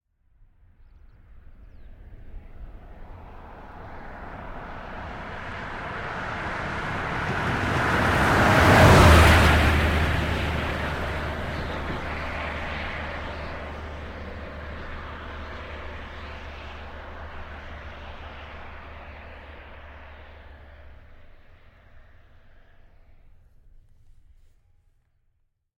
cars in motion wet asphalt passby fast whoosh following mono 8040
This sound effect was recorded with high quality sound equipment and comes from a sound library called Cars In Motion which is pack of 600 high quality audio files with a total length of 379 minutes. In this library you'll find external passes of 14 different cars recorded in different configurations + many more single files.
whoosh, traffic, passby, vehicle, wet, passes, through, engine, street, drive, country, speed, swoosh, drives, field, car, noise, cars, effect, sound, asphalt, doppler, city, swipe, pass, driving, recording, road, tyre